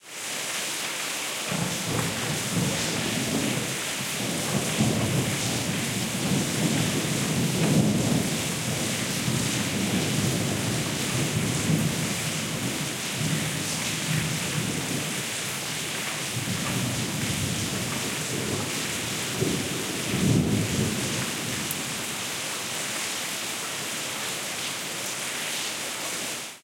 20200512.rain.thunder.83
Heavy rain + soft thunder. Audiotechnica BP4025 into Sound Devices Mixpre-3